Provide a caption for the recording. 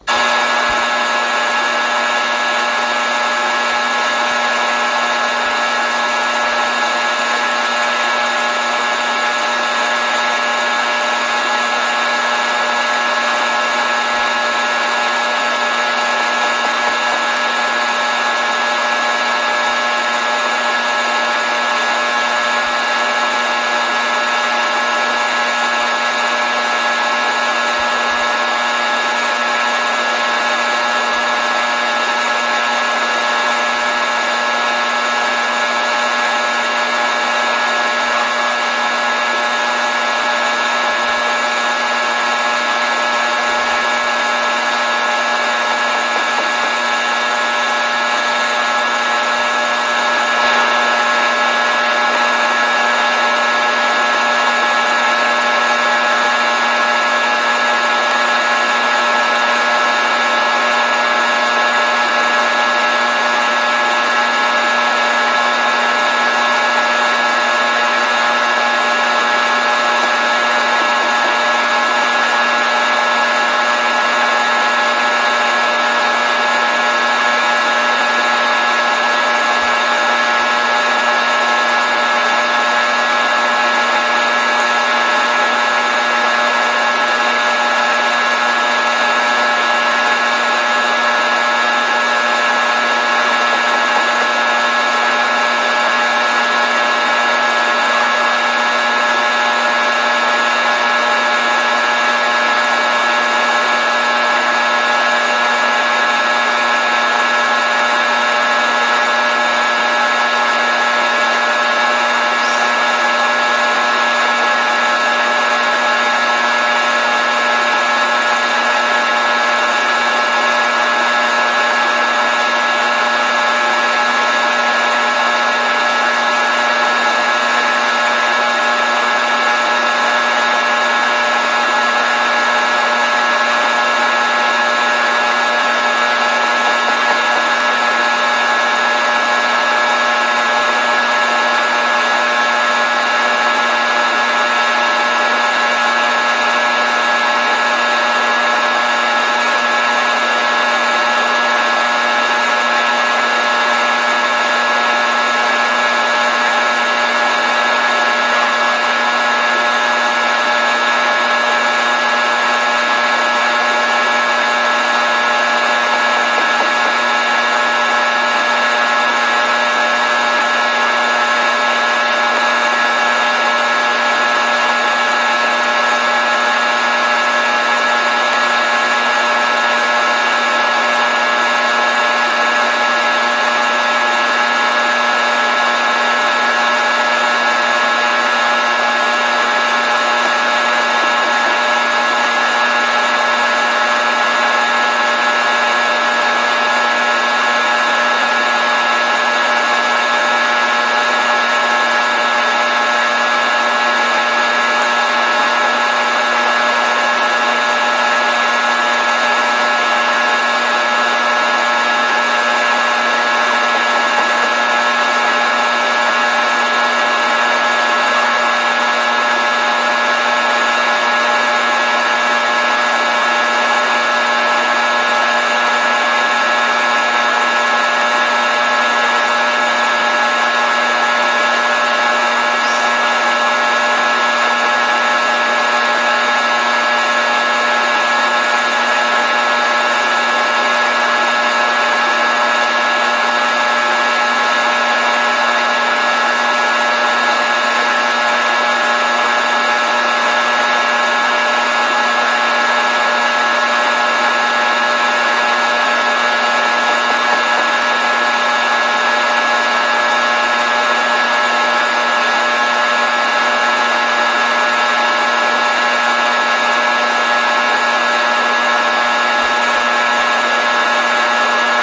This is an extended version of the sound "Florescent lights of the Backrooms_1". It haves a duration of 4 minutes (4 mins 31 sec).